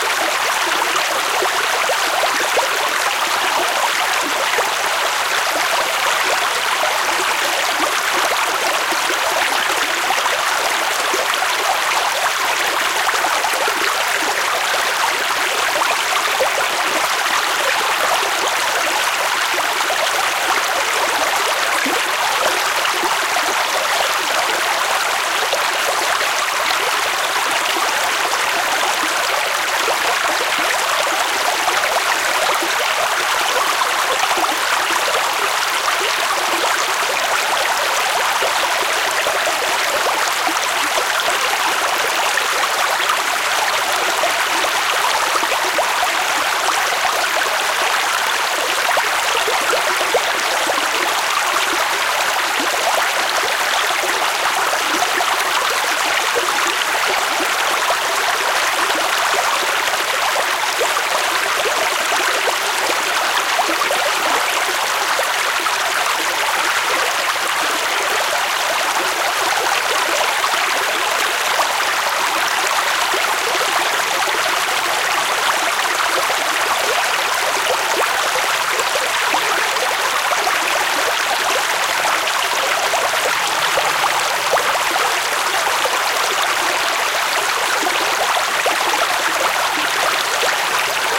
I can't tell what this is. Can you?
A loopable stereo field-recording of a mountain stream, no birds or other sounds. Rode NT-4 > FEL battery pre-amp > Zoom H2 line in.